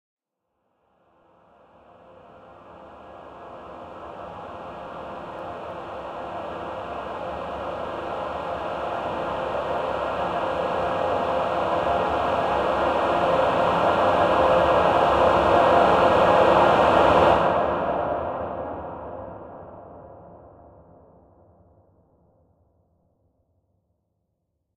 Dissonant Choir Rise 002
Dissonant Choir Rise Sound Effect. Created by layering different choir samples and adding both extensive delay and reverb effects.